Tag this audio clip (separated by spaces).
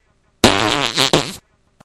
gas noise